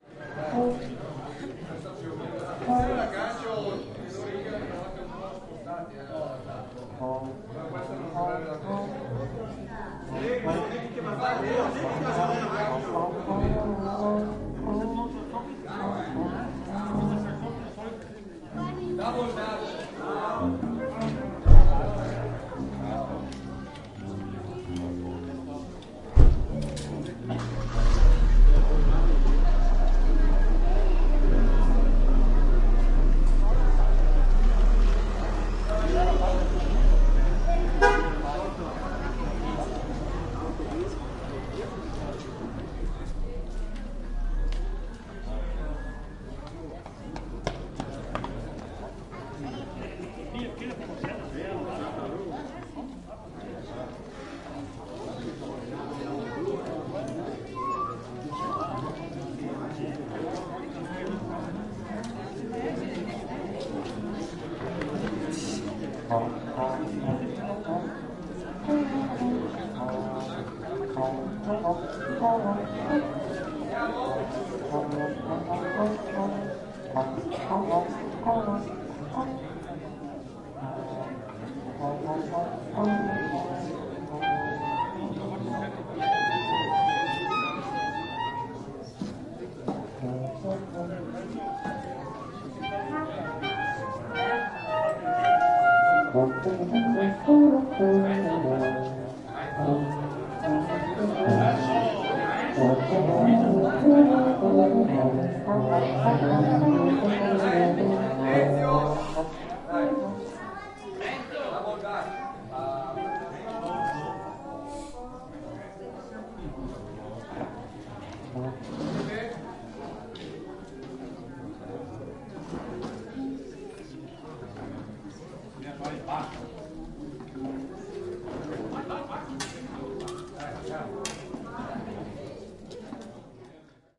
people atmo 3
people atmosphere ambience